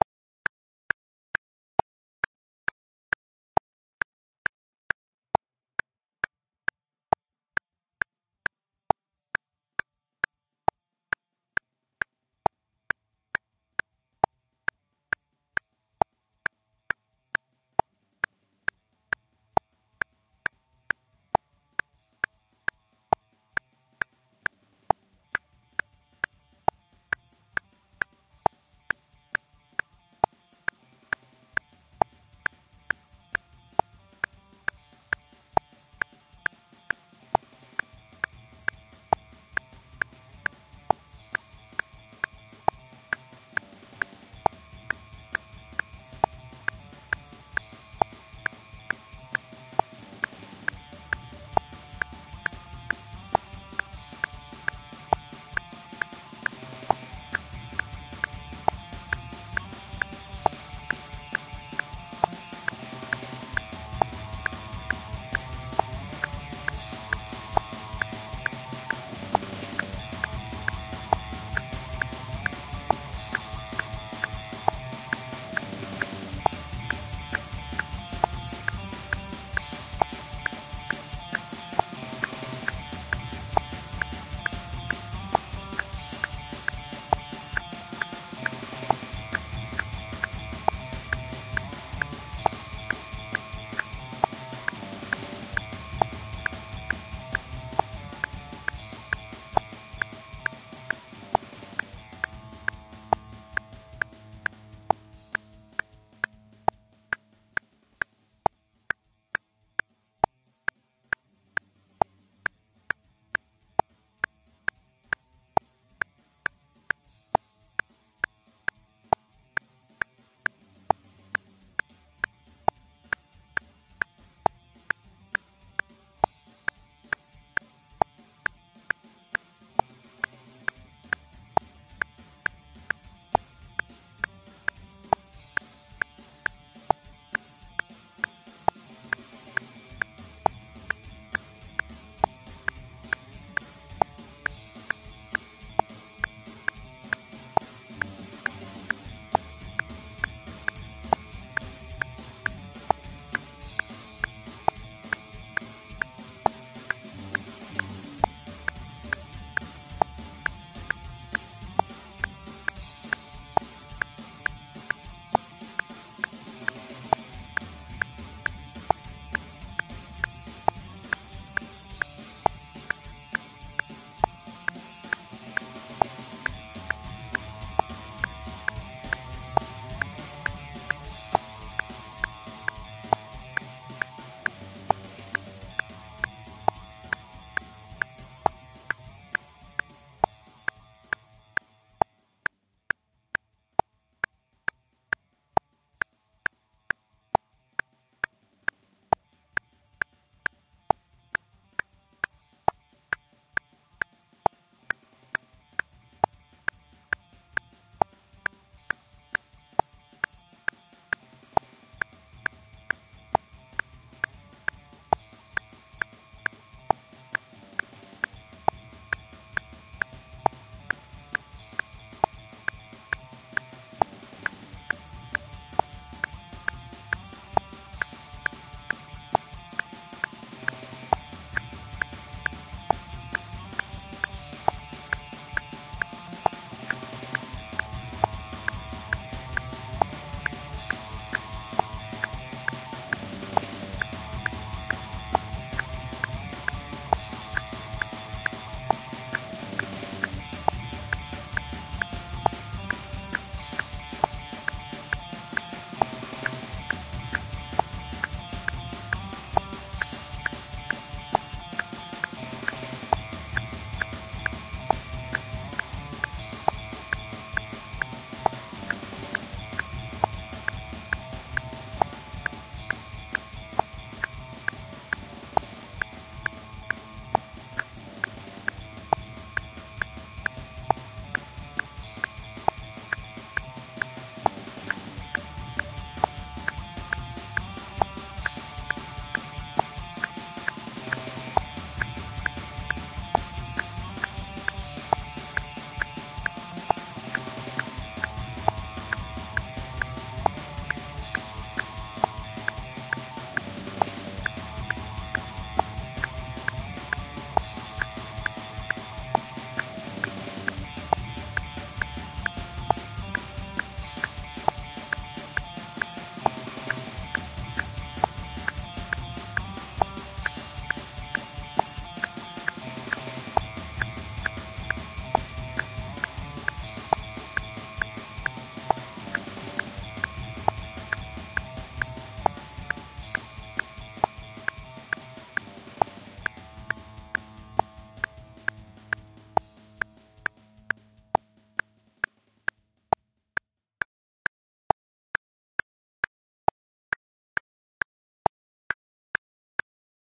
Metronome concentration challenge for for drummers
135 bpm metronome concentration challenge for drumming practice. Can you play drum rudiments locked to the click while a backing track of music comes in and out to distract you? It's a tough one for the brain - good luck!
metronome, exercise, time, concentration, drummer, timing, drums, percussion, practice